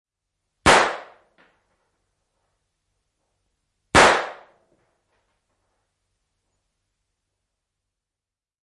Pistooli, laukaus sisällä / Pistol, Mauser 7.65, shot, two gunshots, interior
Pistooli, Mauser 7.65. Laukaus, ampuminen ladossa. 2 x.
Paikka/Place: Suomi / Finland / Liljendal
Aika/Date: 29.09.1989
Laukaus, Pistol, Shot, Yleisradio, Gun, Tehosteet, Finland, Weapons, Soundfx, Finnish-Broadcasting-Company, Yle, Ammunta, Ampuminen, Pistooli, Ase, Field-Recording, Laukaukset, Gunshot, Suomi, Shooting, Weapon, Pyssy, Aseet